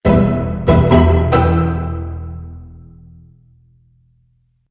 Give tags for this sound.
film-production; intro; movie; cinema; film; mystical; game-development; bonus-sound; mystic

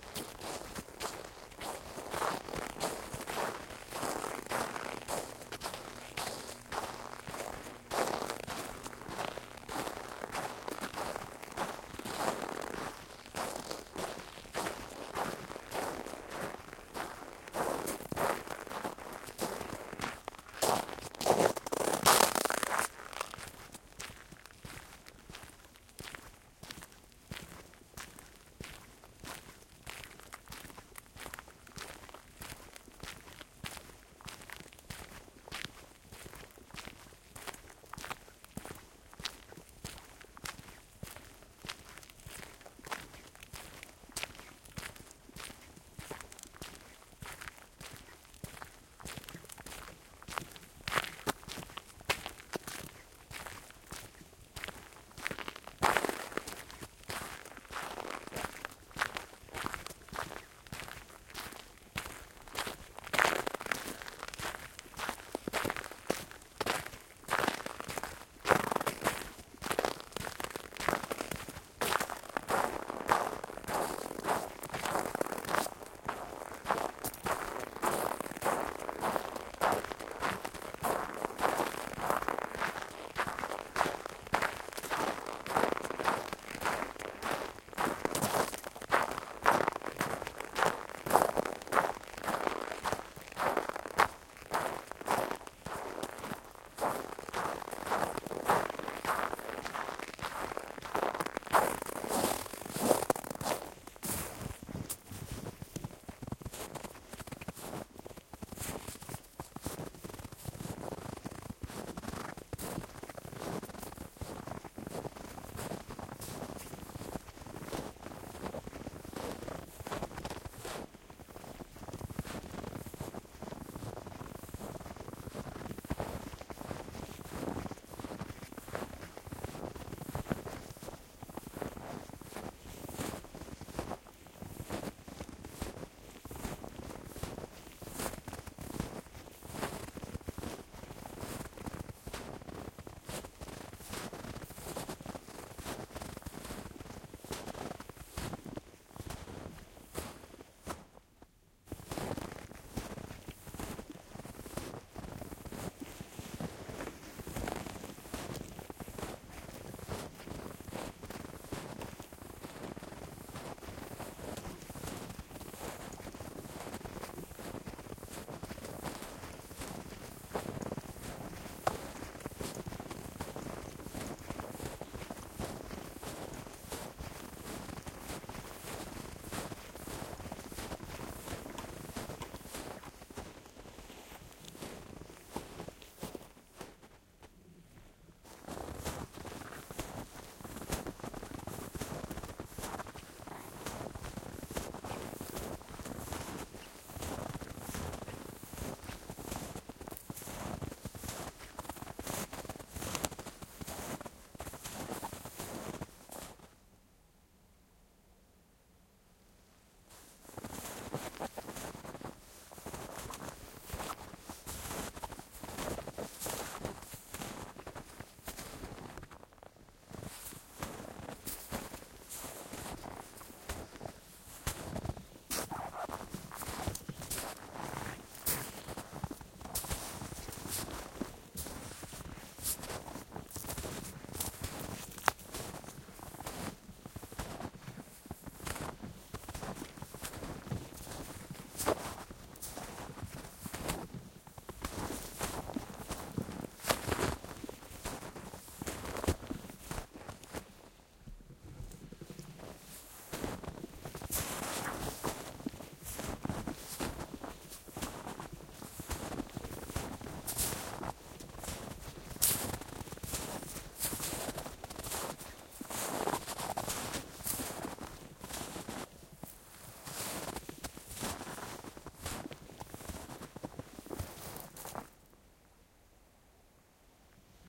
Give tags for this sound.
winter; recording; snow; footstep; field; walking

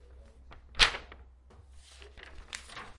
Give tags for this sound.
Foley; OWI; Sound

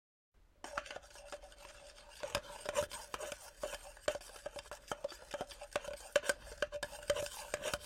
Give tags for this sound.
whisk; whisking; kitchen; cook; pot; chef; pan

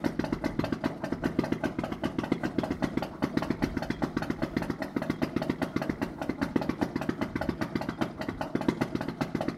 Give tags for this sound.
rhythmic; diesel; engine; cars; idle; loop

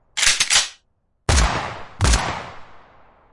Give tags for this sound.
army; attack; fire; firing; gun; military; pistol; reload; rifle; shoot; shooter; shooting; shot; sniper; war; warfare; weapon

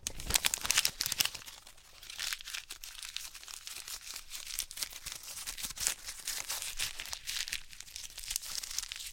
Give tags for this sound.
Paper; Packaging; Crumpling

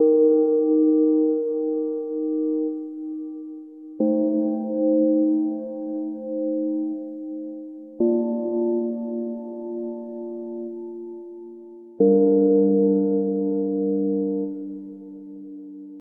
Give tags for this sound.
120bpm; chords; D; KORG; korgGadget; loop; phrygian; synth

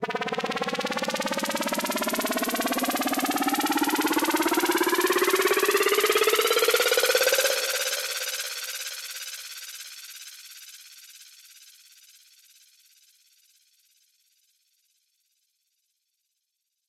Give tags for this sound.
fx; noise

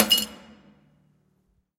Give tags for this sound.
industrial; percussion; hit; field-recording; metal; ambient; drum; fx

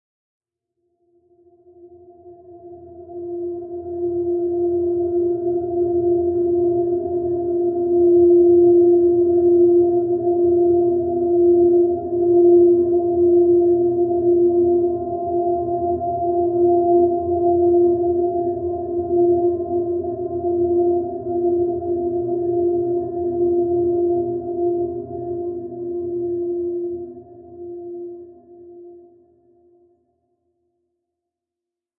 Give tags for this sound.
ambient; drone; multisample; atmosphere

